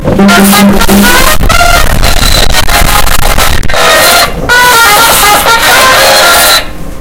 SoundScape GPSUK memphis,dovydas,attila 5w
cityrings, soundscape, galliard